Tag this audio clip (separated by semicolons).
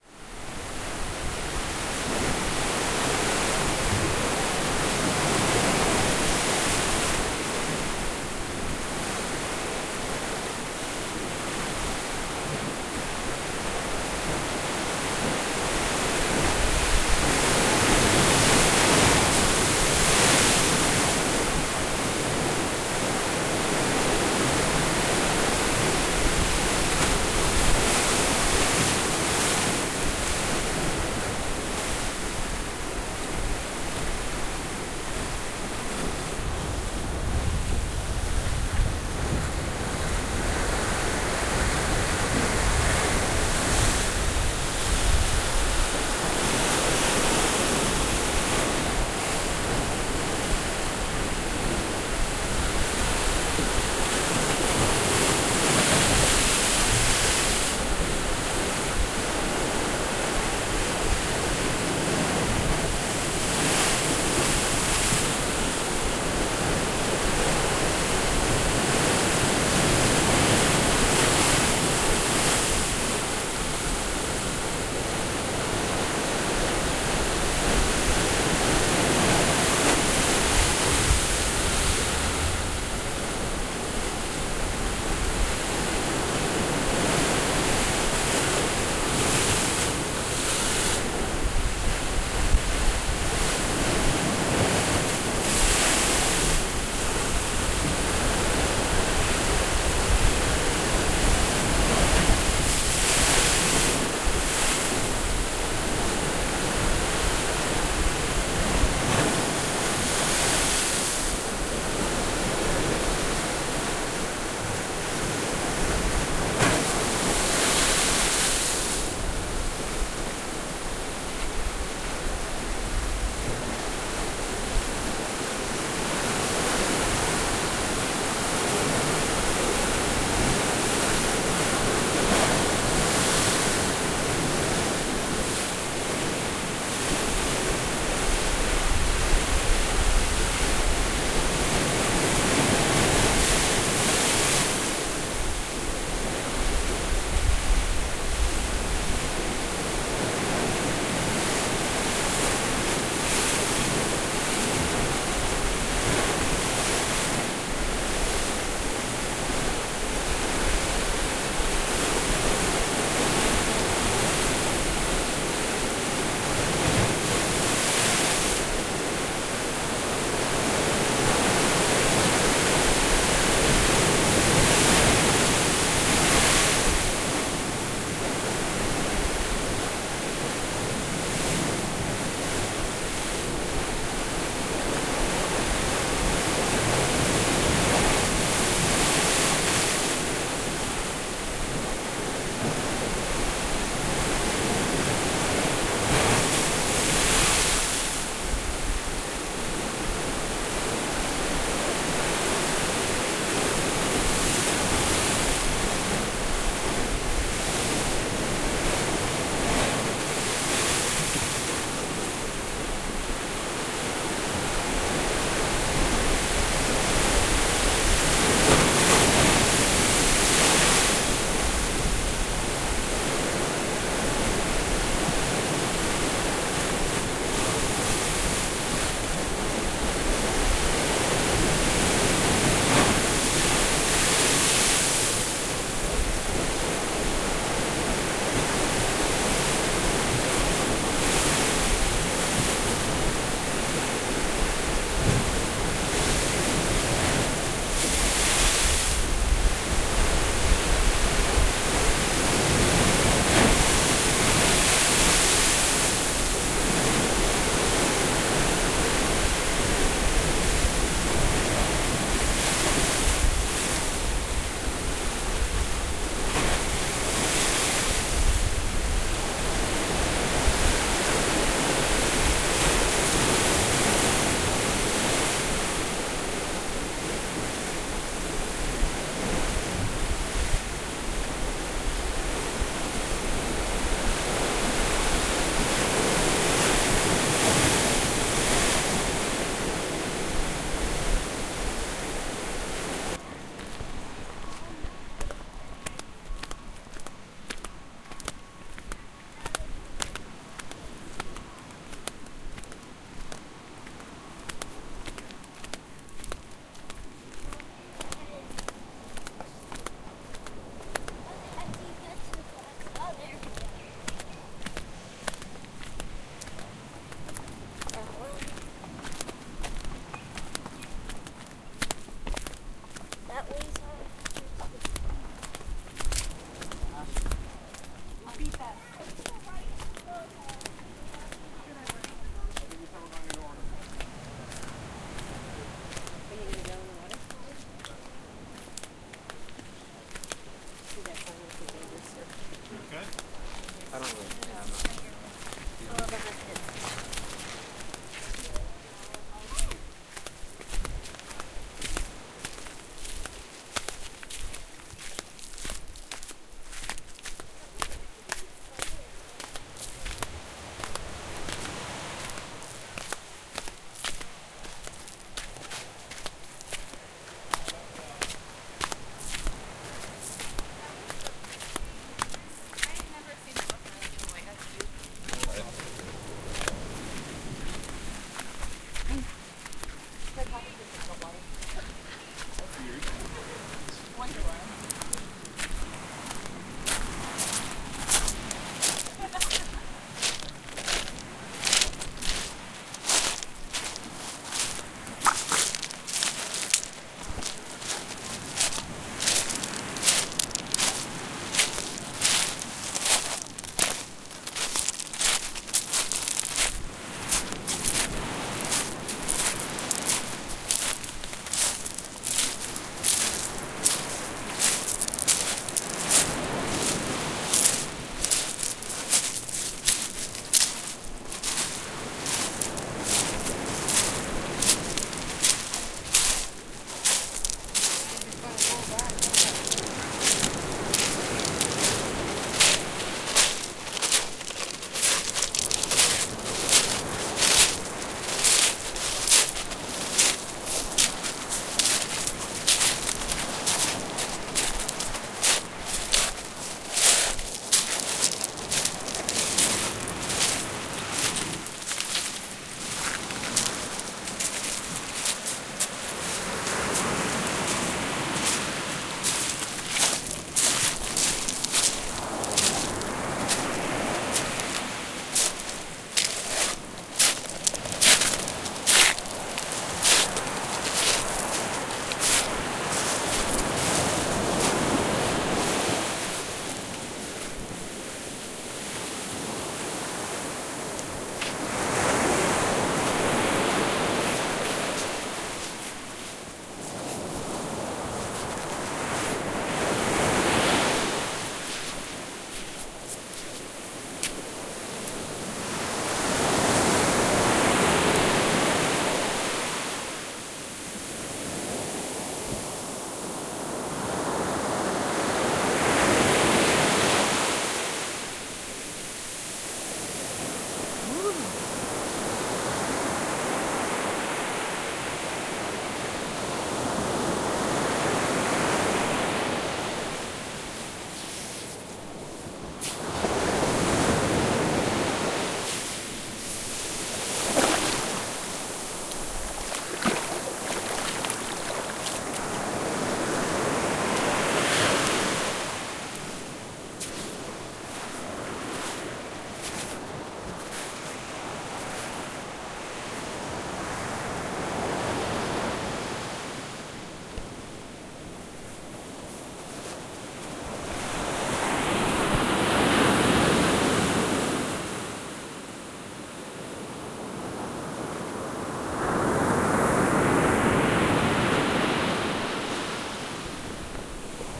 walking; sea-shore; breaking-waves; ocean; black-sand; coast; rocky-beach; waves; shore; surf; wave; beach; seaside; sea